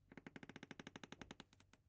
Wood Creak 9
Wooden Creaking
Wooden Chair Creak
Wooden, floor, Creak, Chair, Creaking